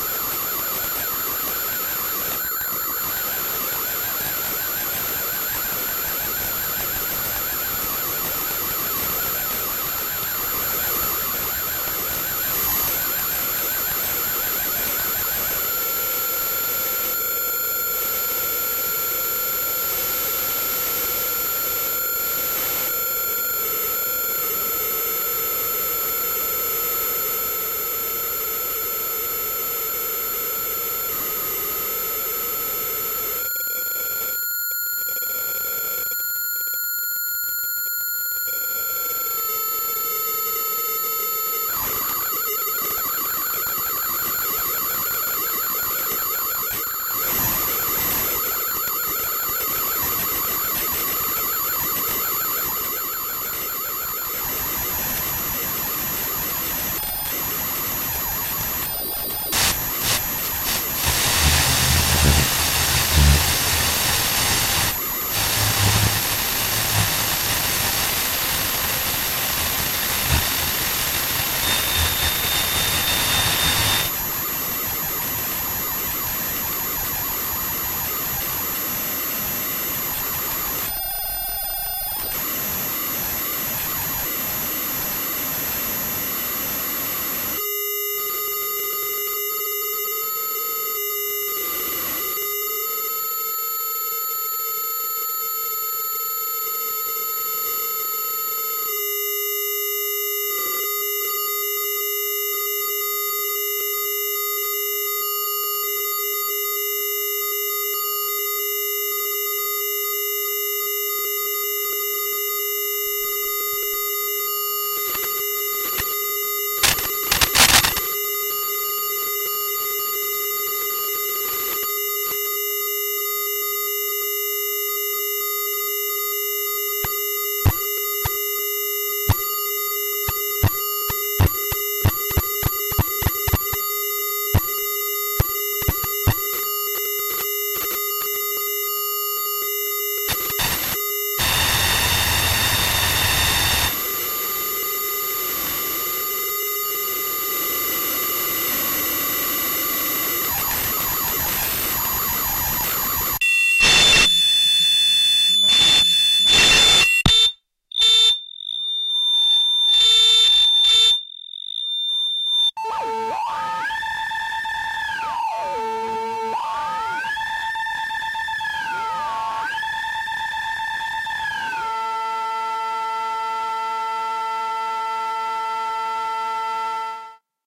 I ran the effect sends from my behringer mixer into the inputs after passing through a zoom bass processor. I added in static from a small radio to track 3 and sent that through the loop as well. I am cool.